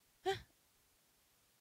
moneda moneda1 moneda3